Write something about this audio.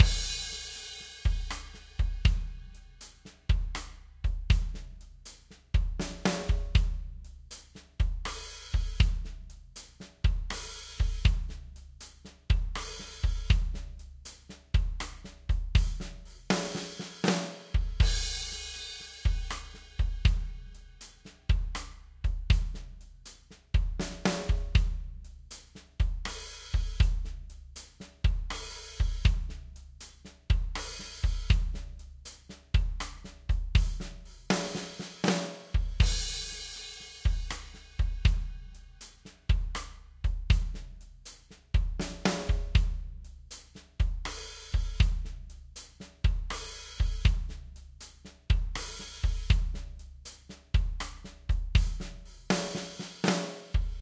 Song5 DRUMS Do 3:4 80bpms
80, beat, blues, bpm, Chord, Do, Drums, HearHear, loop, rythm